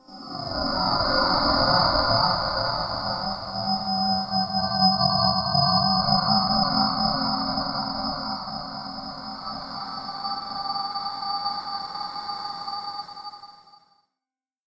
Rewind Invert 010203
atmospheric, ethereal, Mammut, synthetic-atmospheres